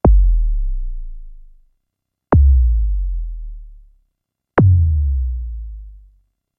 more 808 drops